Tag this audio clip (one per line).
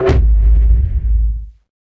epic
cinematic
gong
punchy
movie